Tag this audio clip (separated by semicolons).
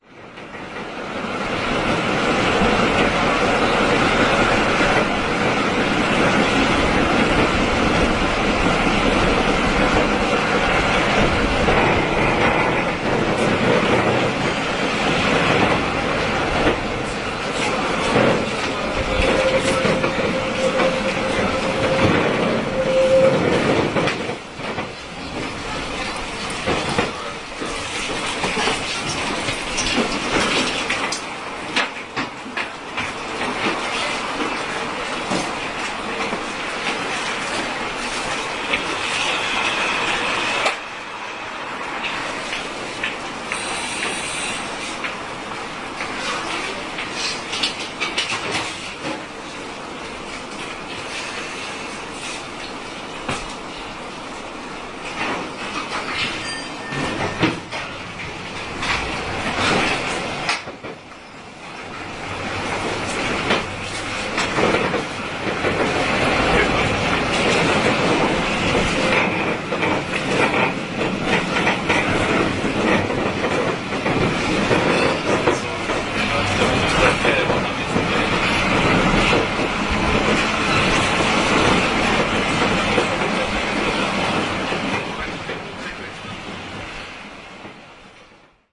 cars; noise; pozna